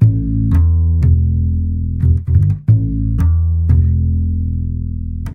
Jazz Bass A 6
jazz, music, jazzy
jazz, jazzy, music